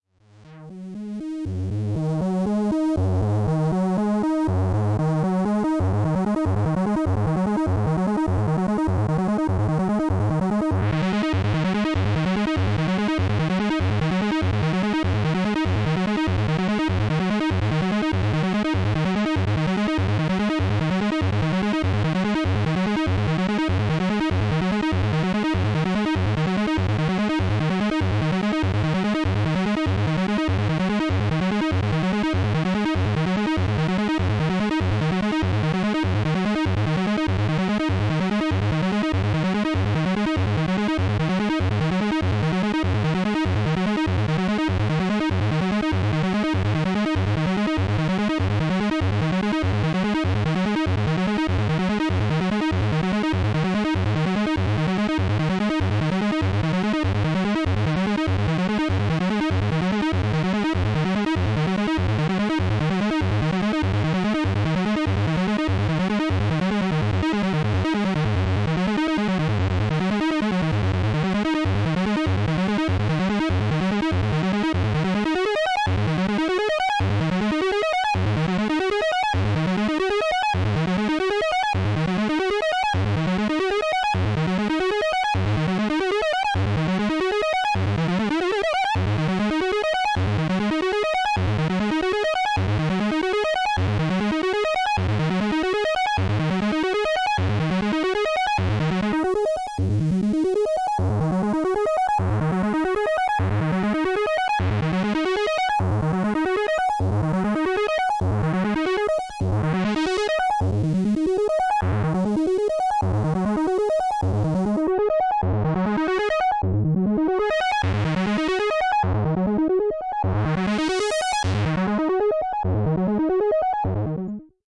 Korg Poly 61 Arp
Messing around with the arpeggiator on the Korg Poly 61
analog, arpeggio, Korg, synth